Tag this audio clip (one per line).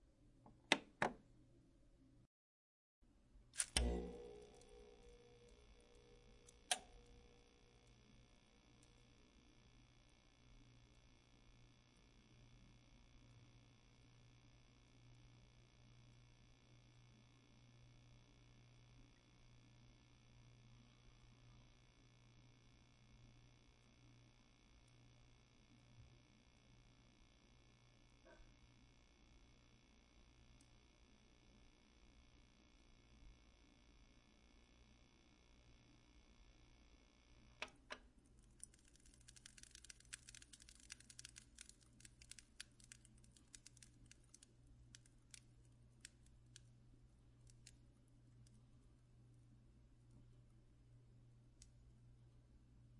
monitor
off